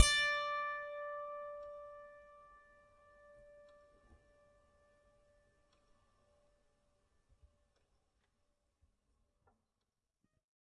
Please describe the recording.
a multisample pack of piano strings played with a finger
strings, multi, fingered, piano